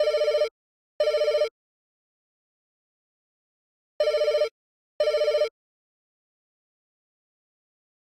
Phone Ring
alert, bell, call, cell, electronic, mobile, old, phone, ring, technica, telephone